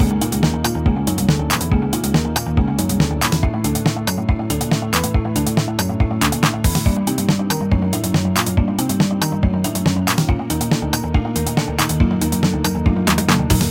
short loops 27 02 2015 2
made in ableton live 9 lite
- vst plugins : Alchemy
- midi instrument ; novation launchkey 49 midi keyboard
you may also alter/reverse/adjust whatever in any editor
gameloop game music loop games dark sound melody tune techno pause
game, games, music, gameloop, techno, sound, dark, loop, tune, melody, pause